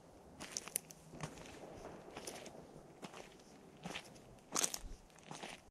Outdoors walk on autumn leaves recorded with a zoom h6.